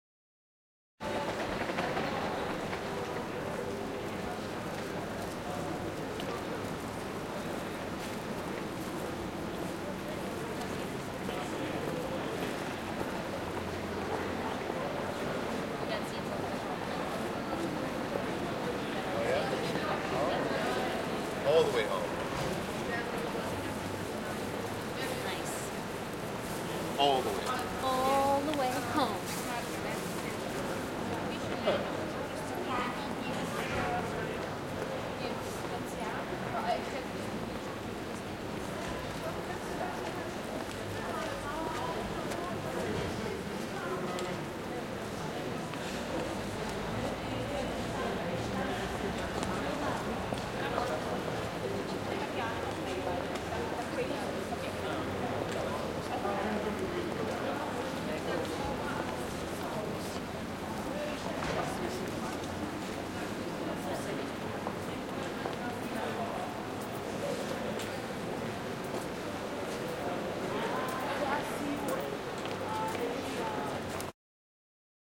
15-1-pedestrian zone
Quiet pedestrian zone. People walking, Prague.
zone, prague, calm, pedestrian